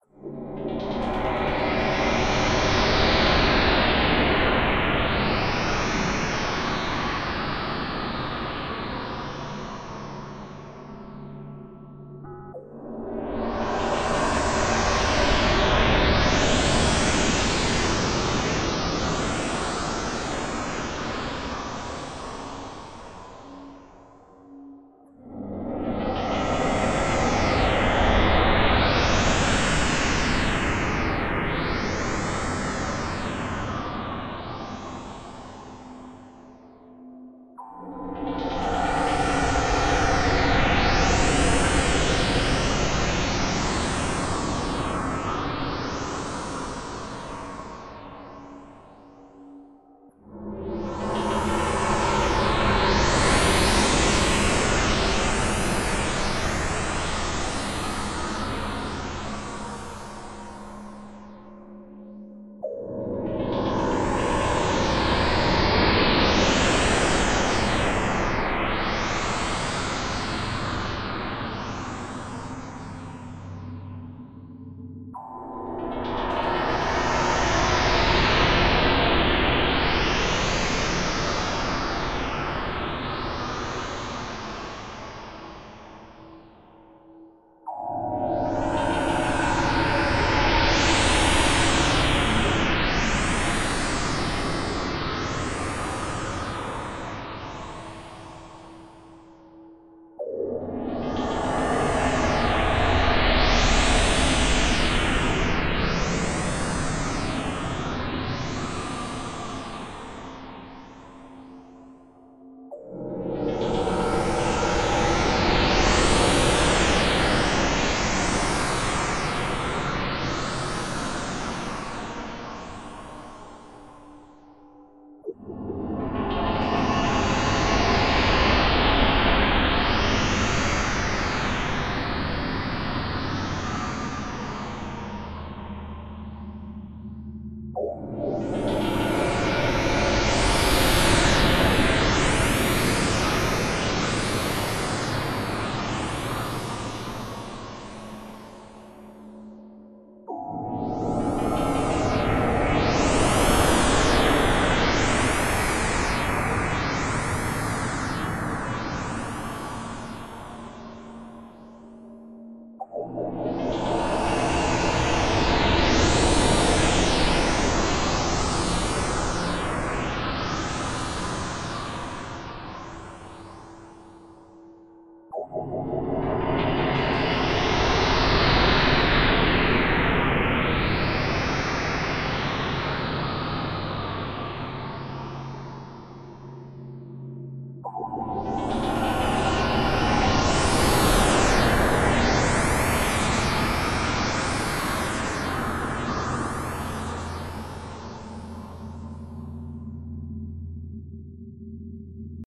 Horror sounds 2
This sound is a second set of darker atonal horror pads / stabs.